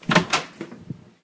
Opening a heavy door (and bounding in)
Someone with urgent news to deliver GRABS the door, SLAMS it open and bounds into the room!